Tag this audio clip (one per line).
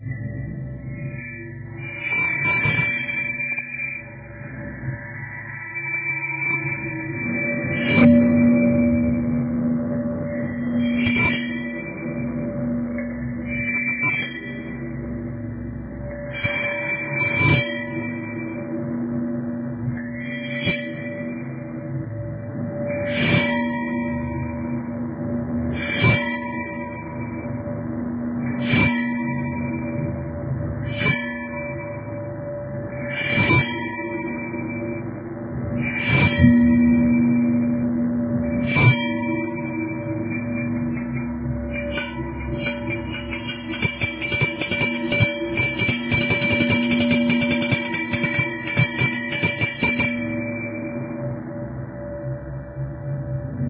eerie
hit
metal
metallic
radiator
scrapping